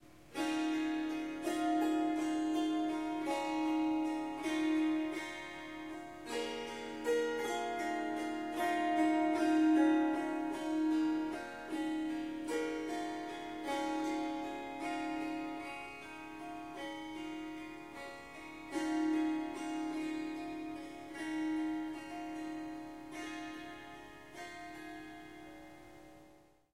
Harp Melody 1
Melodic Snippets from recordings of me playing the Swar SanGam. This wonderful instrument is a combination of the Swarmandal and the Tanpura. 15 harp strings and 4 Drone/Bass strings.
In these recordings I am only using the Swarmandal (Harp) part.
It is tuned to C sharp, but I have dropped the fourth note (F sharp) out of the scale.
There are four packs with lots of recordings in them; strums, plucks, short improvisations.
"Short melodic statements" are 1-2 bars. "Riffs" are 2-4 bars. "Melodies" are about 30 seconds and "Runs and Flutters" is experimenting with running up and down the strings. There is recording of tuning up the Swarmandal in the melodies pack.
Riff, Strings, Harp, Melody, Swar-samgam, Indian, Swarsamgam, Swarmandal, Ethnic, Surmandal, Melodic